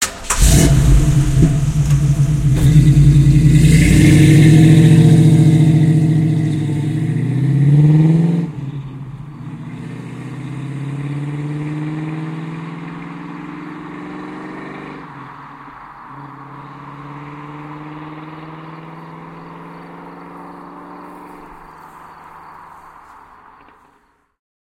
Sportscar Mustang, Recorded on little village Street in the north of France